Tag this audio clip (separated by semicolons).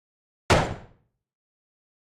firing; gun; gunshot; military; pistol; rifle; shot; war